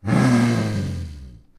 roar aggressive
Recording of a roar used in a computer game for a monster. This is the more aggressive version of three alternating sounds. Recorded with a Sony PCM M-10 for the Global Game Jam 2015.